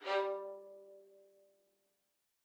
One-shot from Versilian Studios Chamber Orchestra 2: Community Edition sampling project.
Instrument family: Strings
Instrument: Violin Section
Articulation: spiccato
Note: F#3
Midi note: 55
Midi velocity (center): 95
Microphone: 2x Rode NT1-A spaced pair, Royer R-101 close
Performer: Lily Lyons, Meitar Forkosh, Brendan Klippel, Sadie Currey, Rosy Timms